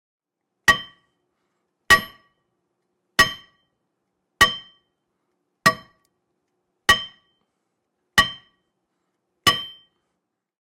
Crowbar Hitting Metal; clang
A crowbar hitting a steel sledgehammer.
percussion, strike, industrial, crowbar, clang, metallic, hit, ting, construction, metal, iron, impact